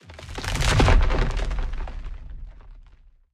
Earth & Ice Impact Spell Skill
break, skill, Ice, Impact, spell, earth